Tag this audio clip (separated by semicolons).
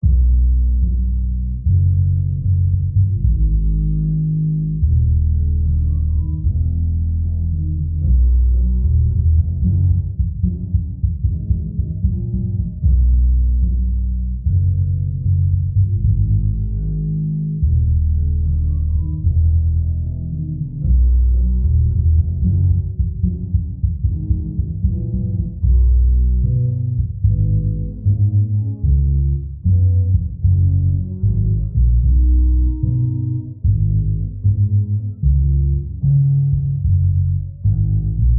game; jazzy; music; Jazz; videogamemusic